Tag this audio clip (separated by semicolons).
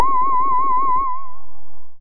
basic-waveform; multisample; pulse; reaktor